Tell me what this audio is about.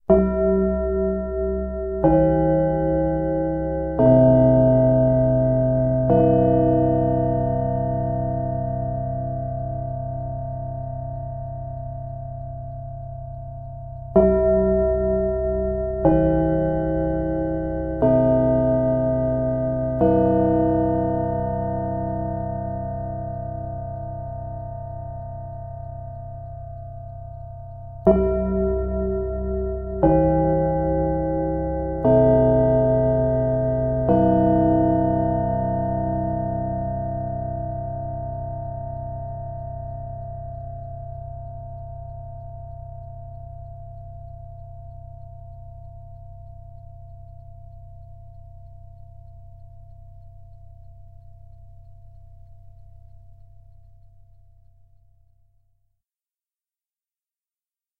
Gong Pause 3x
This is a real 1960's Wandel & Goltermann electromechanical four-tone gong. Gongs like this were in use in the PA systems of German public buildings like schools and theaters to indicate begin and end of pauses. Recorded directly (no microphone) from its internal magnetic pickup. Four tones descending, repeated twice.